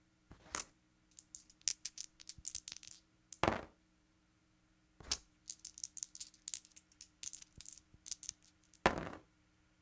FX Board Game Dice02
die, game, rolling